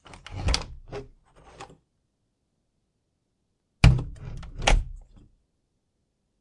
door open close
Door opening and closing with no squeaks.
Recorded with Zoom H5 & SGH-6 shotgun mic.
close, open, door, smooth, clean